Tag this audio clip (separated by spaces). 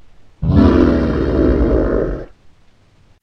Roar Zombie Monster Horror Zombies